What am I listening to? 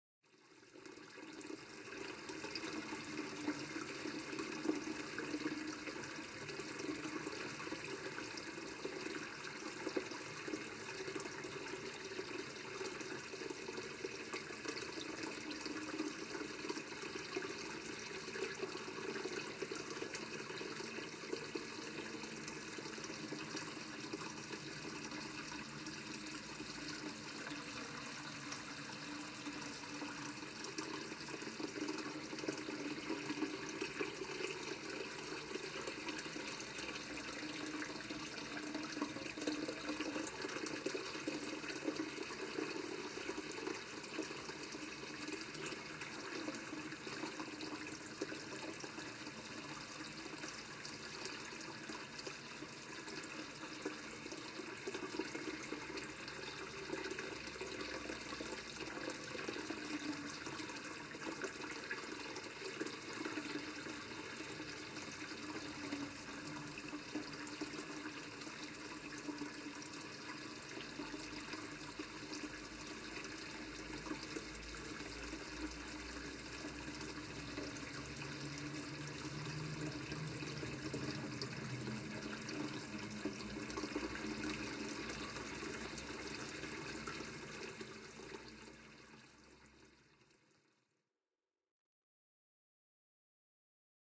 May 29th 2018 at 4 P.M
This is a recording I did near a tiny water dam. This is a small stream of water falling from a few feets only and entering a small tunnel.
recorded with a Tascam DR-40 with the built in microphones on XY position.
Slightly processed in Adobe Audition with some EQ and gain correction.